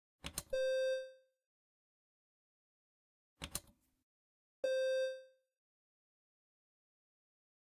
FX OfficeCom 01
A synthesized buzzer for an office intercom.
electronic, synthesizer, intercom, synth, buzzer